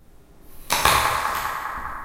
This is an edited sound of someone biting into an apple